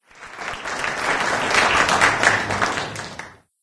In this sound, there is an effect "cross fad in" early, bursting into opening to have a fade effect in the selection, a small amplifier to have a more hard and finally normalization of 0.5dB for equalize the amplitude.